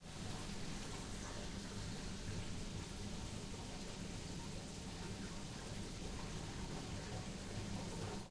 Motor and bubbles in a petting tank at an aquarium
Field recording - recorded with a Zoom H6 in Stereo. Bubbling and filter tanks running in a small petting tank at an aquarium. (There's a shark in the petting tank.)
ambience, amusement-park, aquarium, audio-drama, AudioDramaHub, bubbles, bubbling, hum, machine, motor, sea, sea-life, tank, water, water-tank